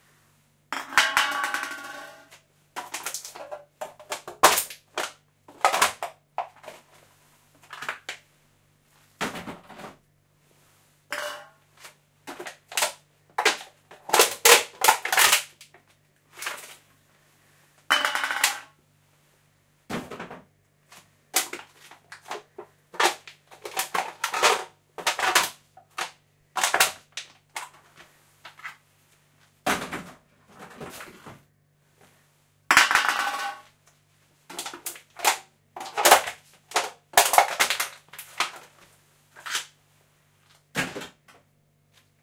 CRUSHING BEER CANS
Beer cans being crushed underfoot and put in recycling bin
crackle, crunch